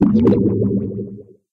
Bubble in water of an aquarium